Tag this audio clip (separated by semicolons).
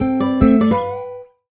mobile; notification; digital; cell; alert